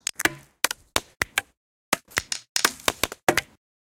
WoodenBeat 125bpm05 LoopCache AbstractPercussion
Abstract Percussion Loop made from field recorded found sounds
Abstract, Loop, Percussion